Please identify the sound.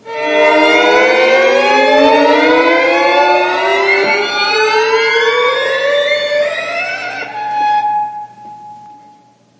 Violins Suspense 1
Violins playing glissando up slides on each of the 4 open strings (All at once!)
I did this by recording violin parts and stack them together, one on top of another in Audacity, then added reverb, and normalized.
This sound can be use for any kind of scary movie, scene, etc.